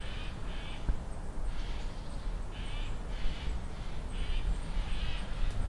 Outdoors Nature Birds 02
Recorded using Zoom H4N Pro Internal mics in a forest near a city